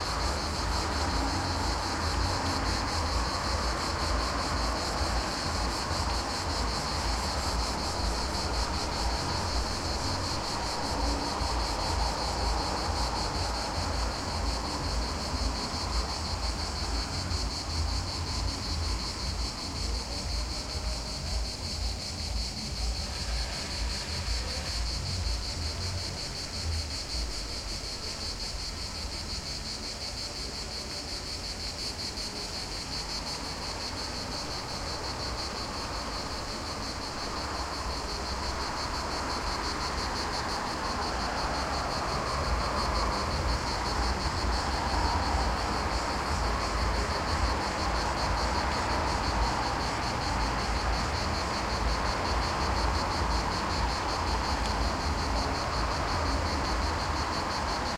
Crickets Split2
Crickets with a busy road far in the backrouund. Recorded from a balcony at around 11 pm in june, 2018 - in Split, Croatia
insects, night, nature, crickets, summer, field-recording